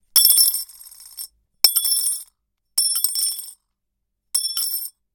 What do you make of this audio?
Metal coin dropped in to porcelain bowl. Close mic. Studio.